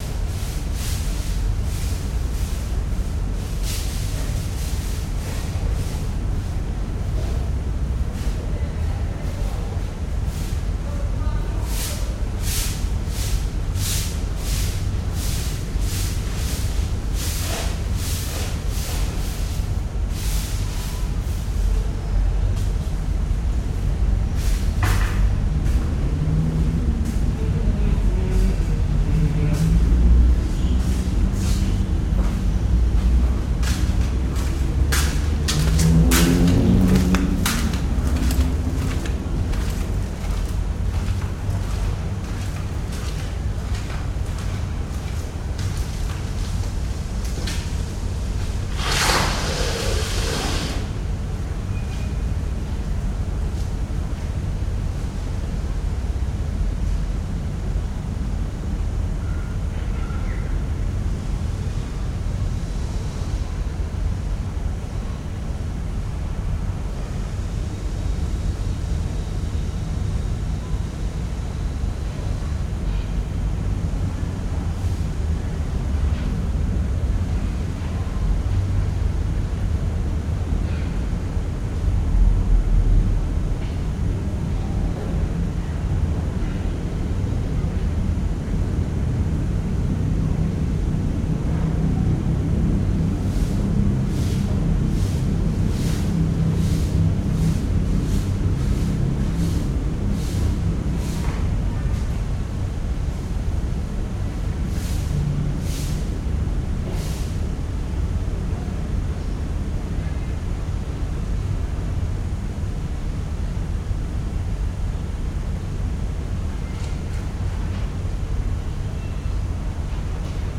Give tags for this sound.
broom; construction; sweeping

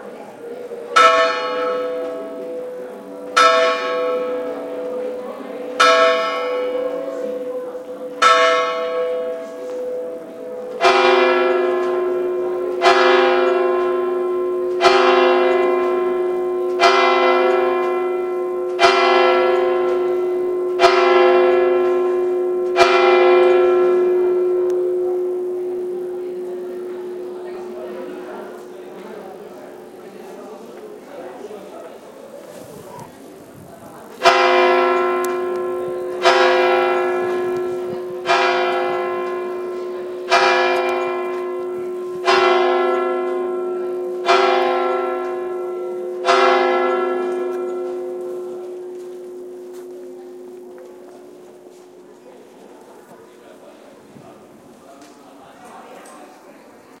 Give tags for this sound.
church-bell; field-recording; ourense; Spain

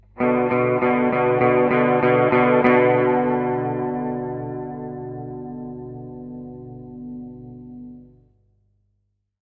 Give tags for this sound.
3; Electric; Guitar; Music; Note

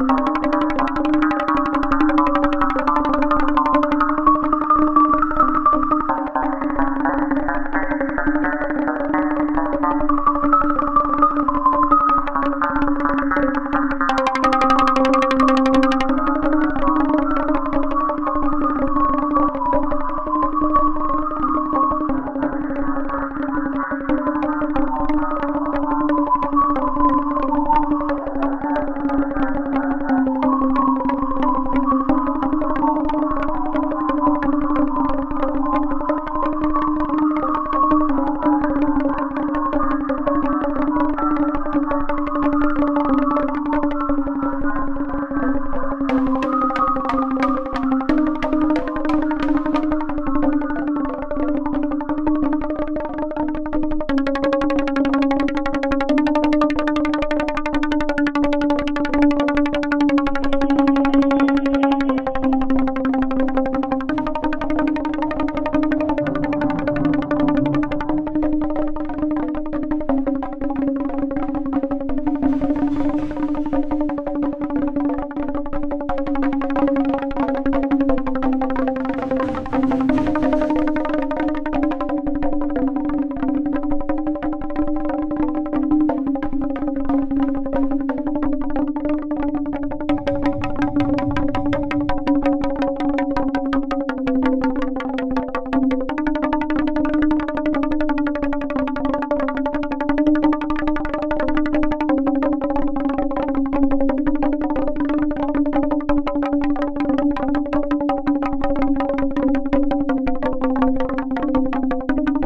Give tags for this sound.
digital; electronic; modular; pluck; plucking; string; synth; synthesizer

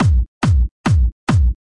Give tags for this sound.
140; 150; arp; bass; beat; bmp; hard; hardtrance; now; sequence; techno; trance

